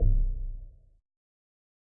lo fi tom 00
A lo-fi tom drum created / edited / layered / altered in Fruity Loops.
drum, sample